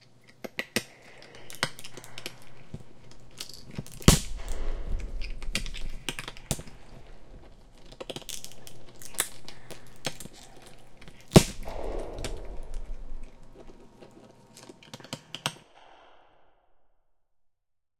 CR SharktoGnawNOAMB
A Sharktopus gnawing on bones
bones, octopus, shark, snap